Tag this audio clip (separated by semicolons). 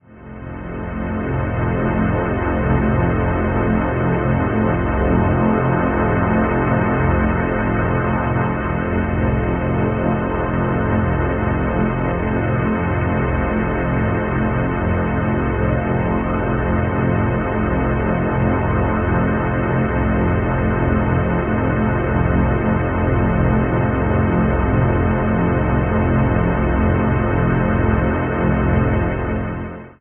ambient; atmosphere; dare-22; dark; drone; img2snd; sonification